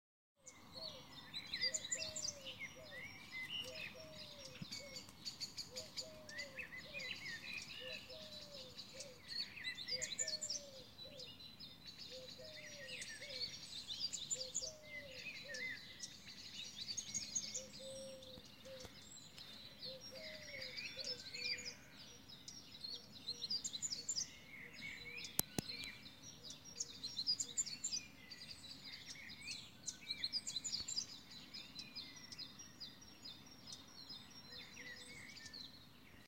Morning-Birds
Birds Morning Nature Bird
Morning, Nature, Birds, Bird